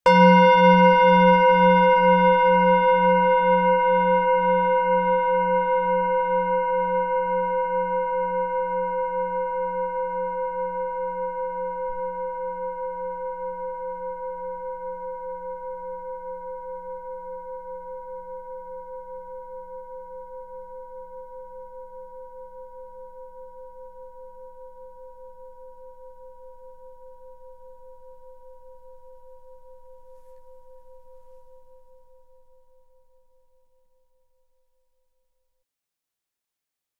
singing bowl - single strike 7
singing bowl
single strike with an soft mallet
Main Frequency's:
182Hz (F#3)
519Hz (C5)
967Hz (B5)
singing-bowl, Zoom-H4n